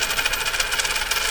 A short sample of coin twanging just before landing completely. You can loop and get an interesting sound.
Recorded by Sony Xperia C5305.